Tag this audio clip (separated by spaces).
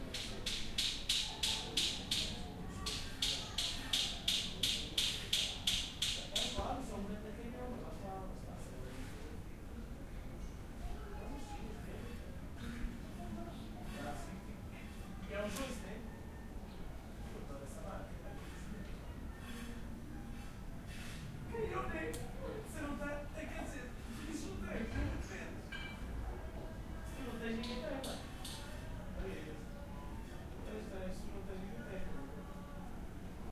city field-recording lisbon portuguese soundscape street voices